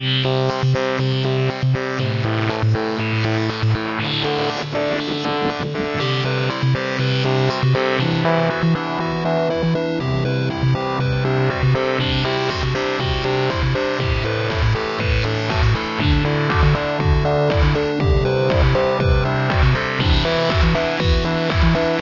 dreamscape beginning
loopable, electro sounds, good for dj or club projects